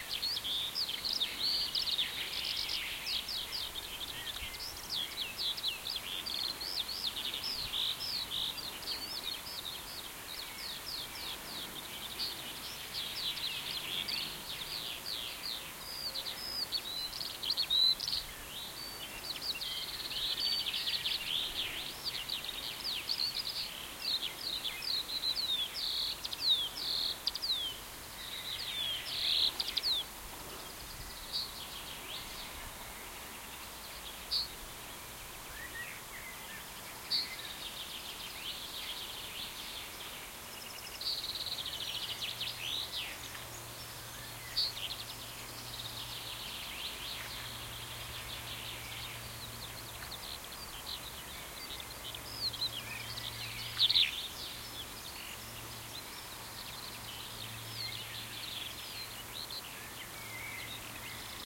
Another try to get a recording of those skylarks. This one was pretty close, visible without binoculars, the recording was done again the Soundman OKM II binaural microphone and a Sharp IM-DR 420 MD recorder.